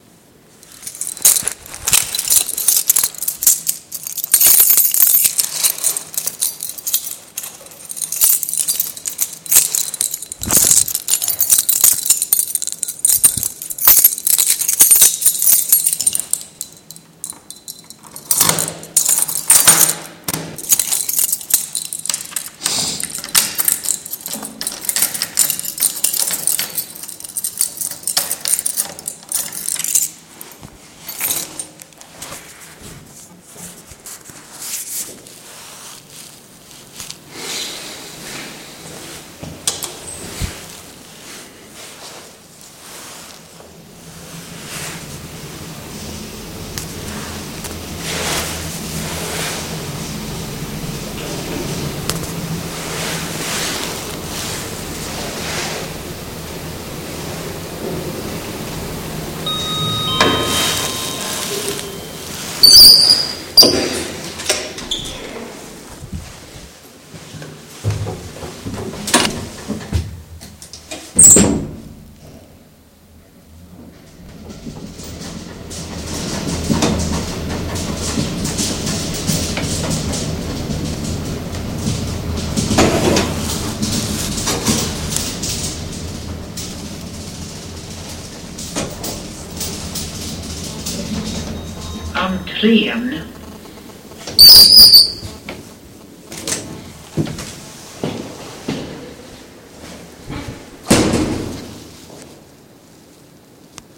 Elevator-ride
I'm locking my door, trying to find the keys with one hand ain't easy. Calling the elevator, it arrives with a ding dong synthetic bell and a muffed announcement from the speaker-voice that it is at level 6 (my floor). I get in through a squeeky door, and activate the button for a ride down to the lowest floor, the speaker voice says "Entrén".
Recorded at Lingvägen 177, Hökarängen, Stockholm., Sweden. It is a house where blind and sight impaired people (like me) live. This is a mono recording, recorded with the app TapeMachine on my Samsung Galaxy Spica android phone.
chimes, chinks, dingdong, door, elevator, field-recording, keys, lock, rattles, speaker-voice, squeek, tinks